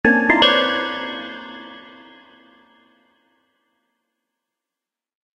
I made these sounds in the freeware midi composing studio nanostudio you should try nanostudio and i used ocenaudio for additional editing also freeware
intros, application, intro, bleep, desktop, effect, event, clicks, blip, sfx, sound, game, click, startup, bootup